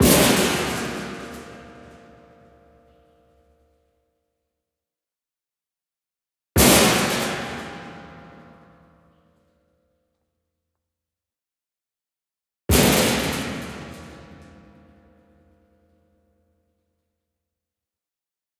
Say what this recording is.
Metal Splash Impact
A couple of metal impacts with a wine container lid. Recorded in a wine cellar with a Sony PCM D100. Enjoy!